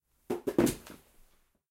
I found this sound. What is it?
Body falling to floor 2
A body falling heavily to a wood floor, natural reverberation present.